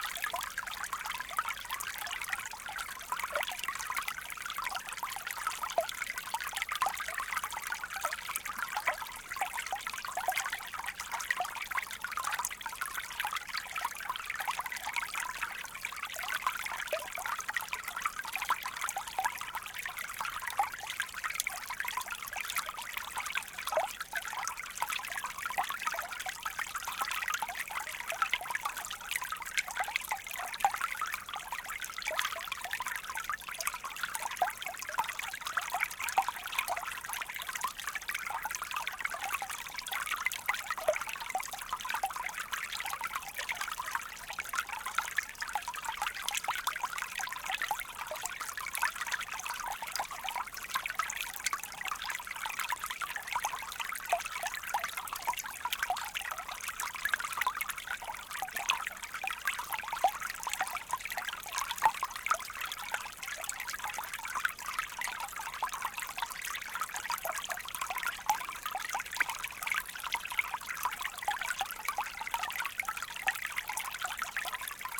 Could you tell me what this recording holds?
water river Guber small stream detailed subtle medium distance stereoXY
This sound effect was recorded with high quality sound equipment and comes from a sound library called Water Flow which is pack of 90 high quality audio files with a total length of 188 minutes. In this library you'll find various ambients and sounds on the streams, brooks and rivers.
ambient, atmo, atmosphere, brook, creek, detailed, flow, liquid, location, natural, nature, relaxing, river, soundscape, splash, stream, subtle, water